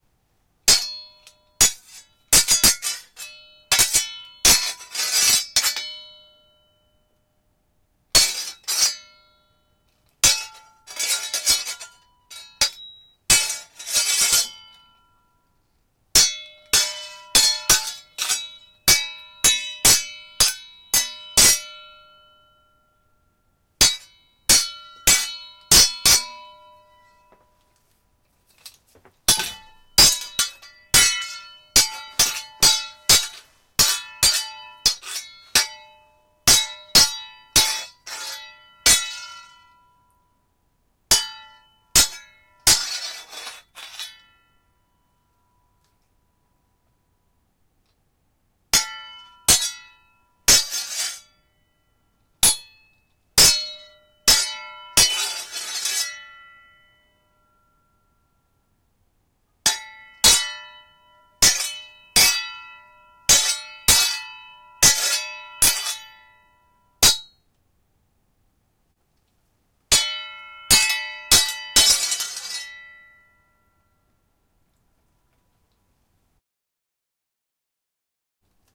sword against sword
Swords clashing in a fight. Pure clashing sounds, created with a bastard sword (one-hand-and-a-half, forged for exhibition-fights) and a sharpening steel. Recorded on Zoom H2.
battle, clashing, combat, fight, fighting, knight, medieval, sword